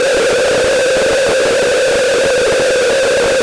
A nasty screech with a distinct bite.